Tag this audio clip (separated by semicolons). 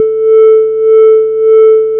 wahwah; waves